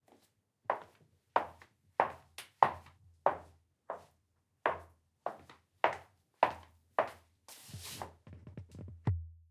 Walking in High Heels
boots, concrete, feet, floor, foley, foot, footstep, footsteps, heels, high, shoes, step, steps, walk, walking